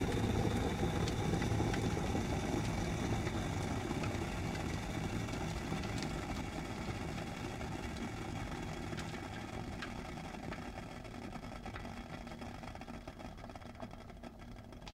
Steaming kettle in kitchen